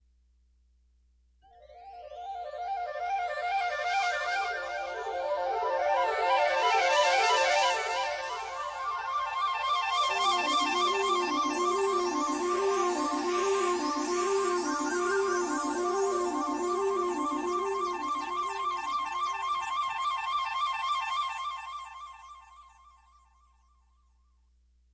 Clean E minor chord (E-B-E-G-B-E)played on a Fender Stratocaster with noiseless pickups. Processed for a 'clean' sound through a DigiTech GSP2101 Artist Pro guitar processor. Recorded to a Boss BR-8.
analog, chord, clean, guitar